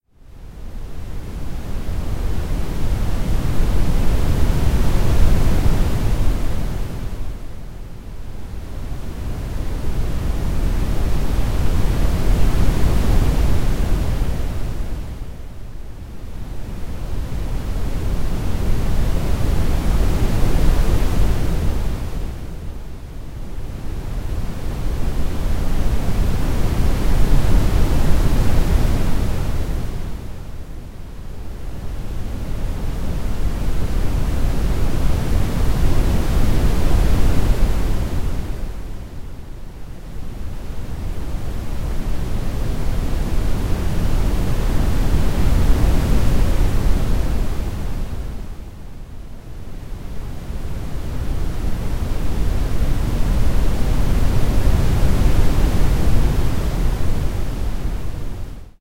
**Production
Made using only Audacity :
- Generate a red noise (amplitude 0.8)
- Apply different cross fade in and fade out a cross to partition the sound
- Apply an echo (delay 15, decreasing 0,5)
- And apply a grave effect (5)
**Typologie: Impulsion variée
**Morphologie :
Masse : son cannelé
Timbre harmonique : bersant, calme, paisible
Grain : le son parait rugueux, impression de matière
Allure : le son ne comporte pas de vibrato
Dynamique : l'attaque est douce, ouverture lente
Profil mélodique : variation serpentine